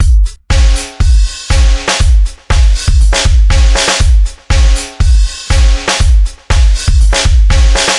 Silene Drums 120 03
Electronic rock rhythm soft distorted and compressed
drum, beat, loop